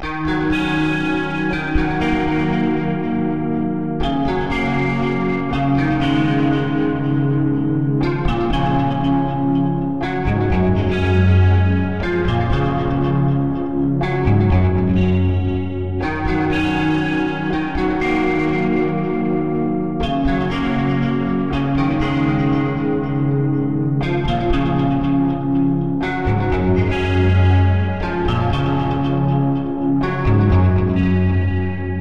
guitar 0045 120bpm
guitar loop 120bpm